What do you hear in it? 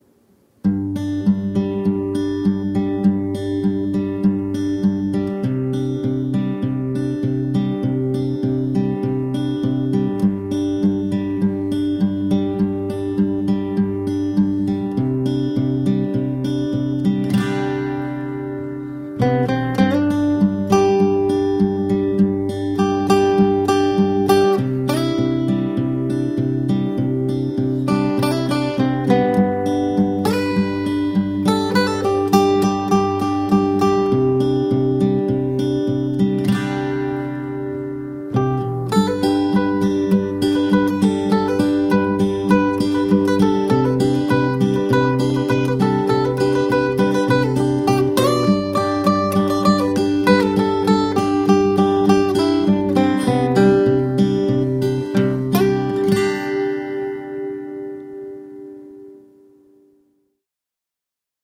acoustic guitar duet
a short duet with two acoustic guitar parts. this is the sort of ditty you'd hear under a photo slideshow - not overly happy or ballad-like, but laid back and easy to listen to.
once through the chord progression without any solo, then twice with a folksy, rootsy solo part over top.
recorded with a Zoom H1 and lightly processed in Logic Pro X.
acoustic, acoustic-guitar, background-music, chord, duet, easy-listening, film, folk, guitar, instrumental, plucked, soft, solo, song, stock-music